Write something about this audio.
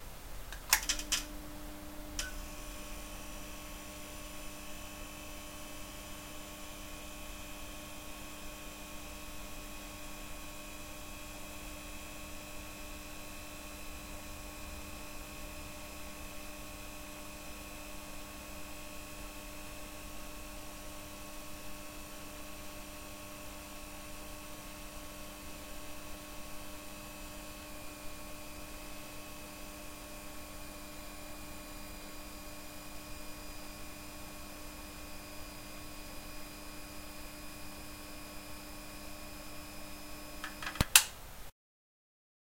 Neon Light buzz - cleaned
A self recorded neon light (has the on and off switch sounds included too).
recorded/mixed/created by
Patrick-Raul Babinsky
Do not forget to credit :)